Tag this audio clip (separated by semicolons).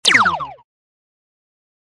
audio; clip; fire; gun; handgun; laser; noise; pistol; shot; weapon